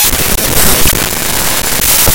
Glitch Element 18
Glitch production element sourced from an Audacity Databending session
data, databending, glitch, production-element, raw